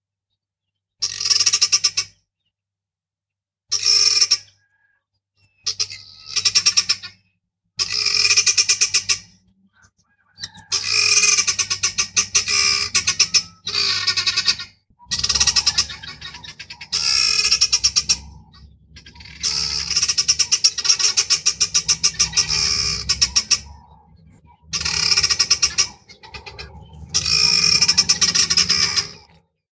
Guineafowl calls isolated - South Africa
These guineafowl woke me up at 6am so I had nothing better to do than record them. A little cleanup and noise removal.
annoying, bird, birds, calls, cries, field-recording, fowl, guinea, Guineafowl, isolated, limpopo, screech, south-africa, wildlife